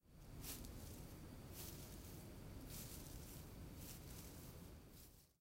pasto yard arbusto
repeat, arbusto, pasto